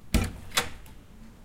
Door Opening
open, doors, wooden, opening, door